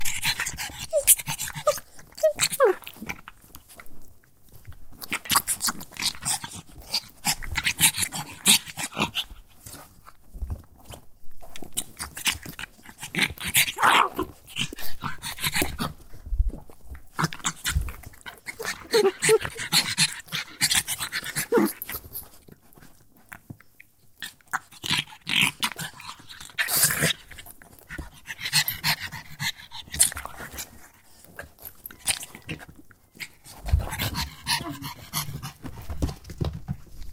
Two puppies playing, recorded close with a Tascam DR-40
yelp, whimper, puppy